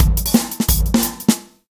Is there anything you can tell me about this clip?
eardigi drums 18
This drum loop is part of a mini pack of acoustic dnb drums